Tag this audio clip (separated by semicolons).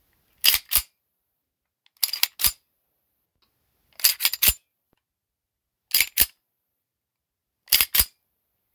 Firearm; Pistol; Gun; Weapon; Cocking; Hand-Gun; Cock